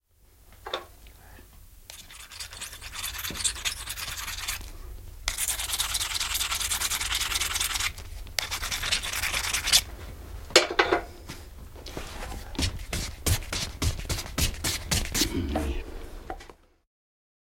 Suutari, veitsen teroitus / A shoemaker sharpening a knife with a hone and thong
Suutari teroittaa veistä kovasimella ja nahkahihnalla.
Paikka/Place: Suomi / Finland / Lappeenranta, Hujakkala
Aika/Date: 30.01.1972
Shoemaker, Tehosteet